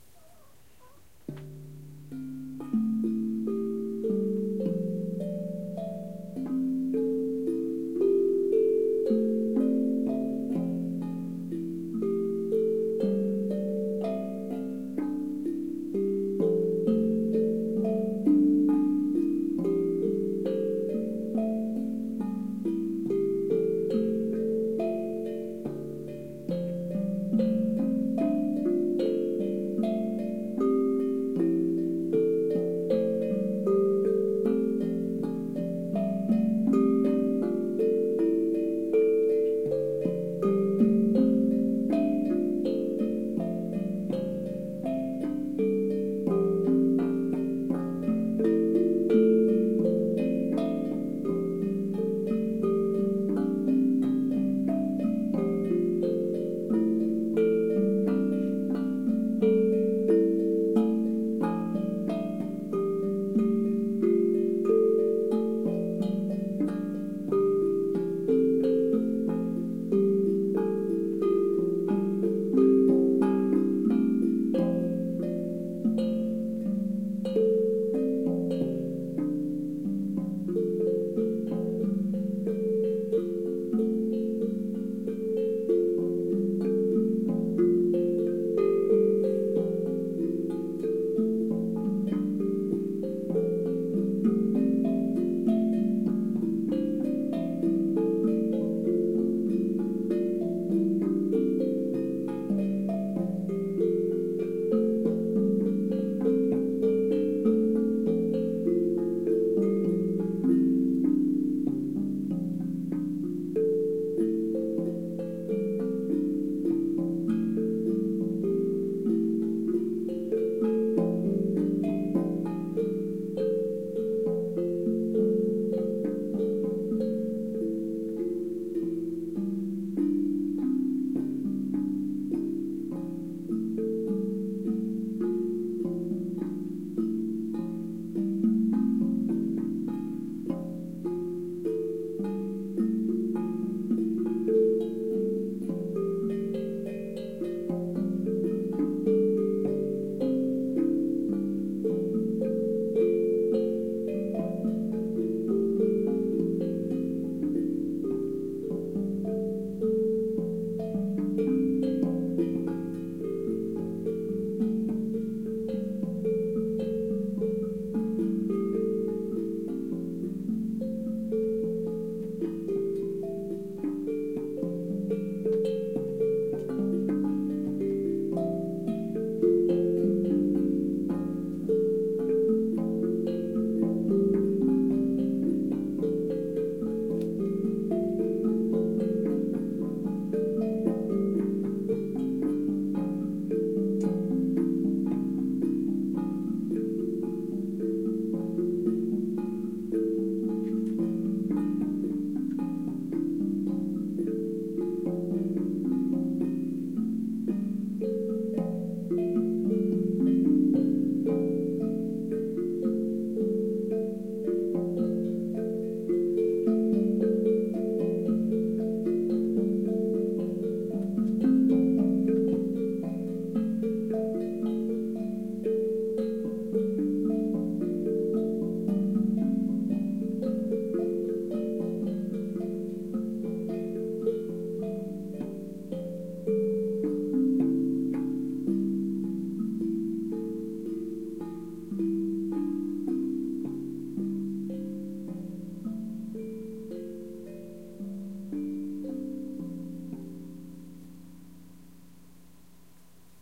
Hapi Drum Conversation - Track 1
Hapi Drum improvisational duet with Debby and Gordon Rosenberg, featuring Hapi Mini C Major and Hapi Origin D Minor. Recorded as is, no editing, 2 Hapi drums, one Zoom NH4 recorder, one session. Recorder set 3 feet away, at front center, recording level 80. Recorded on March 11, 2016, indoors in our studio.
drum, duet, hapi, improvisation, meditative, percussion